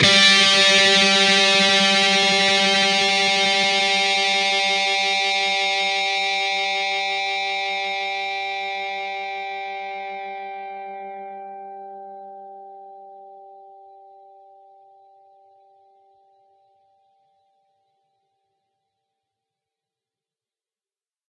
Dist Chr Grock 2strs 12th up
Fretted 12th fret on the D (4th) string and the 15th fret on the G (3rd) string. Up strum.